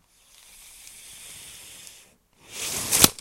Blinds opening and closing